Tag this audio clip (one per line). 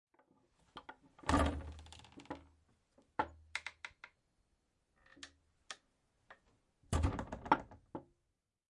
closing
creak
door
heavy
opening
wooden